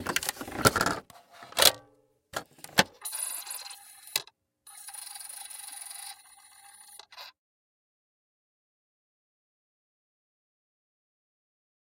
open-cd-player-play-close
Opening a CD player, putting a disc in, closing, and pushing play.
audio, cd, cd-player, disc, foley, machine, mechanical, media, recording, technica, vibrating, walkman